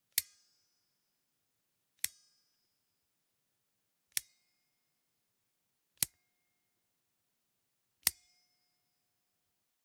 Recording of metal scissor with spring. Tascam DR-100.